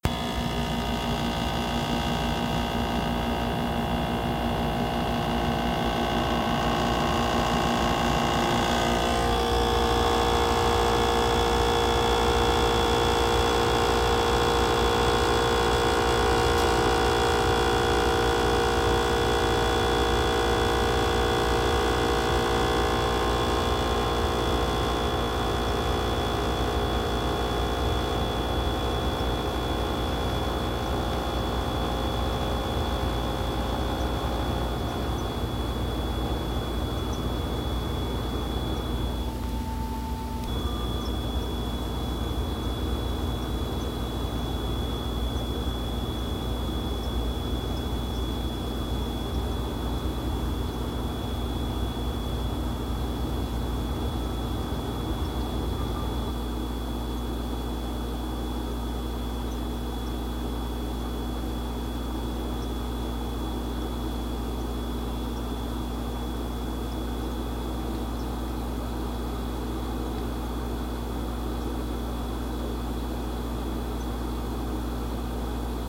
A noisy refrigerator